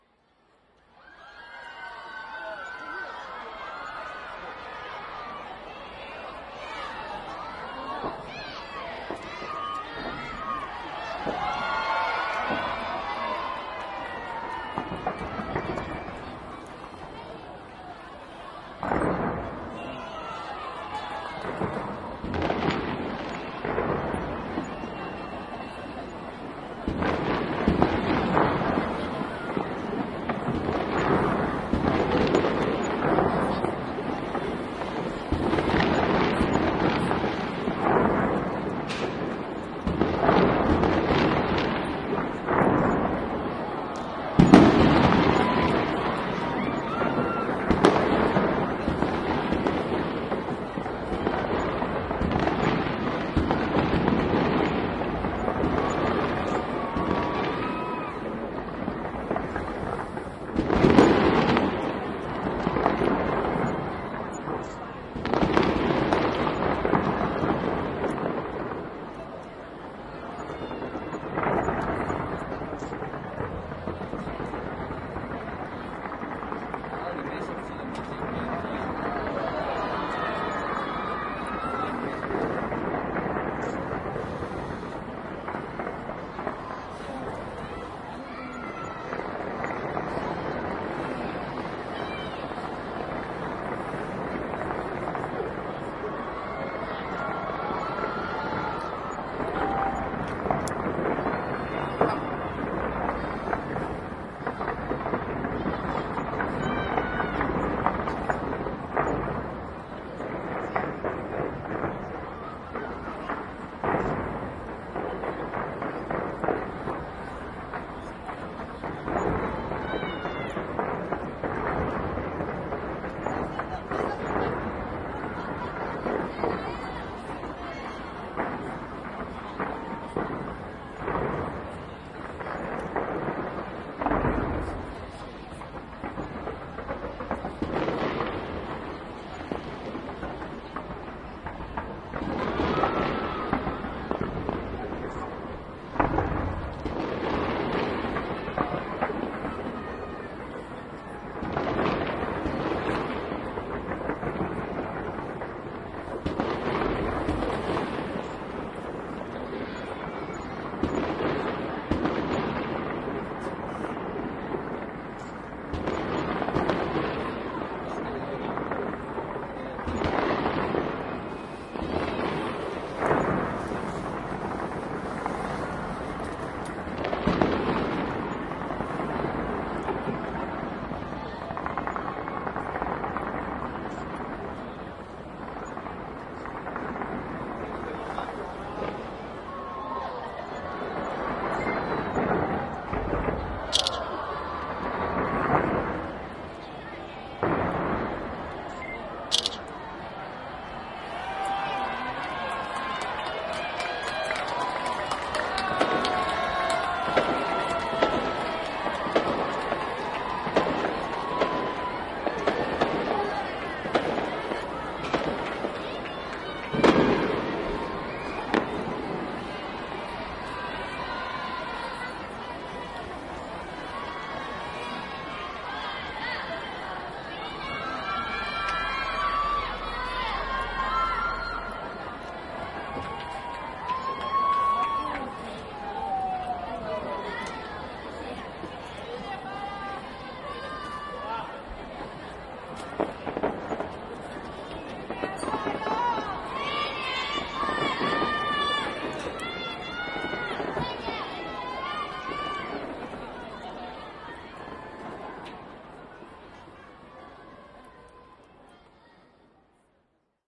NEW YEAR 2013
January 1, 2013. Recorded with ZOOM H2n on my apartment roof during the New Year's fireworks display at the Taipei 101 building. Explosions, neighbors cheering and shouting "Xin Nien Kuai Le" (Happy New Year!).
Raw. No added effects. Natural reflection off surrounding buildings.